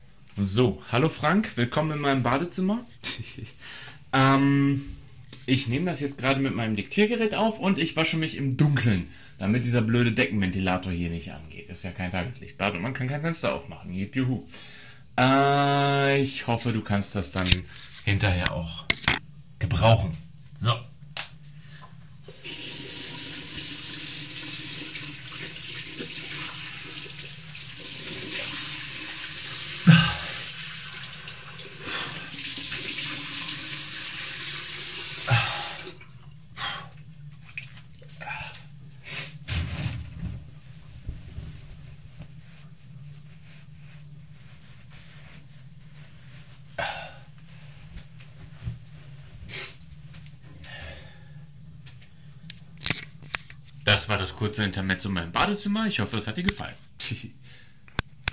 Bathroom wash face
Someone washes his face in a bathroom.